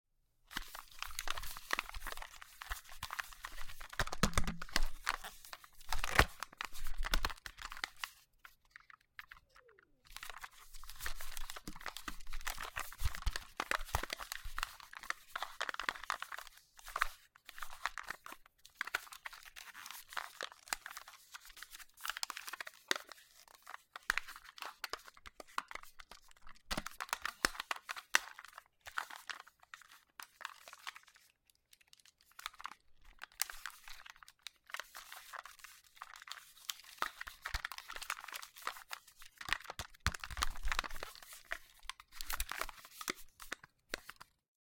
Handling sounds for the listed cassette recorder - moving the small recorder in the hand and changing grips.